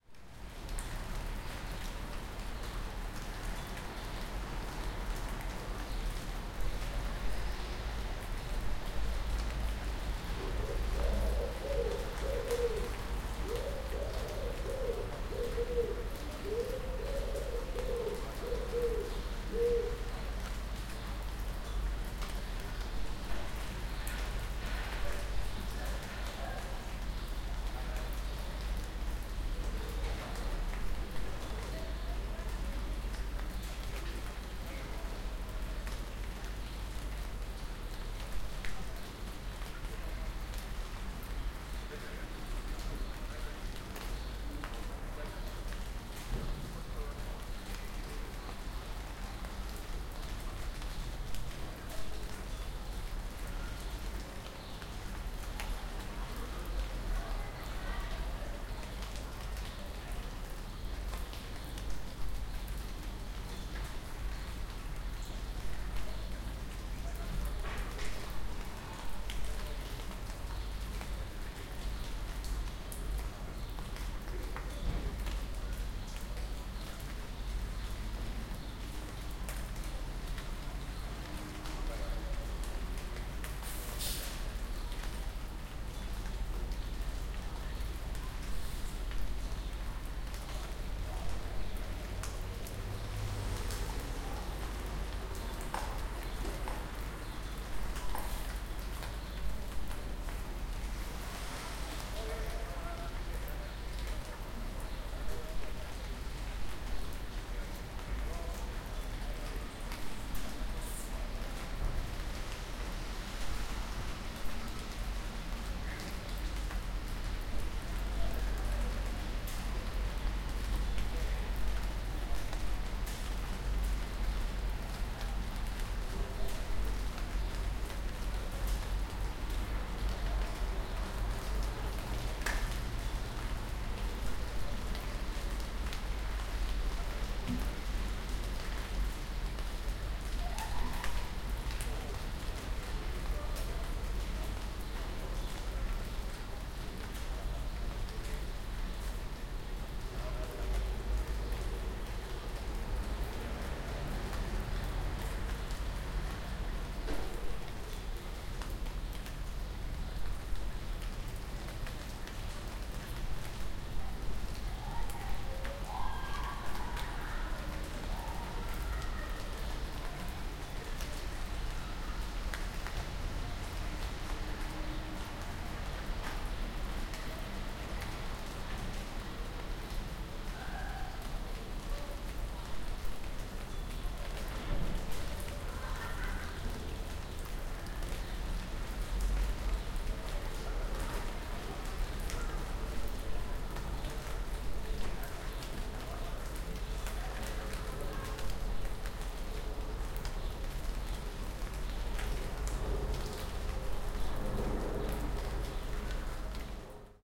Light rain in a city backyard
Recorded from balcony of an old house in a city. Rain is dripping from the trees in the backyard.
Recorded in Basel, Switzerland.